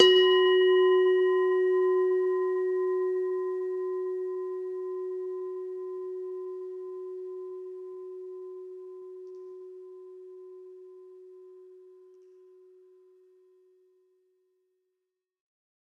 mono bell -8 F# 16sec
Semi tuned bell tones. All tones are derived from one bell.